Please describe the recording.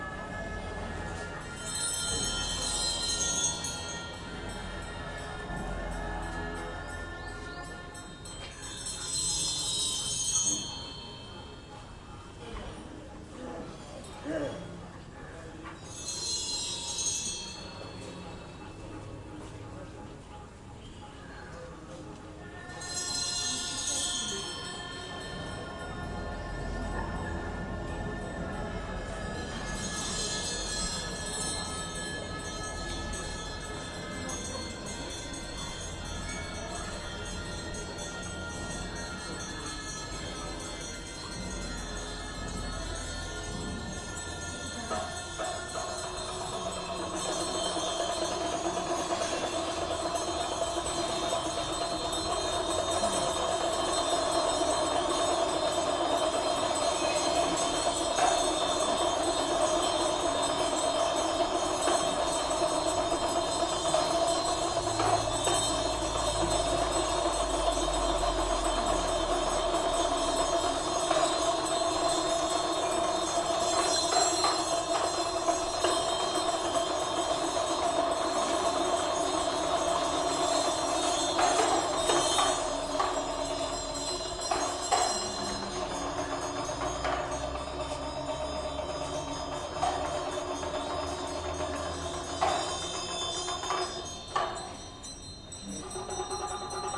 BR 094 Himalaya Buddhistmonks
Buddhist monks praying in Himalaya.
In this file, you’re outside, in front of a Himalayan monastery, and you can hear Buddhist monks praying, chanting, playing drums, horns and bells.
Recorded in September 2007, with a Boss Micro BR.
prayer, Buddhist, Himalaya, pagoda, voices, Tibetan, horns, Field-recording, mantra, atmosphere